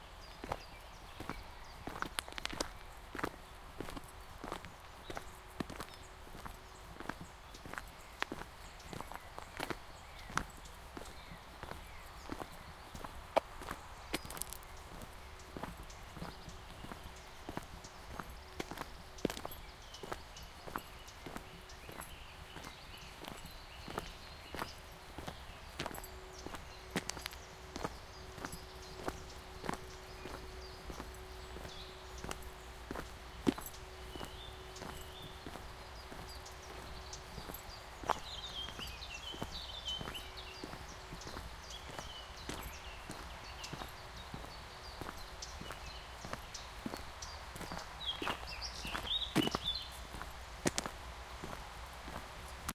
Walking on a forest road. River noise nearby.